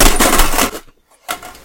Parts falling
falling noise of different small to middle size parts (bits in a metal case). Created for Empire uncut.
falling, metallic, Space, Empire-uncut, Star-Wars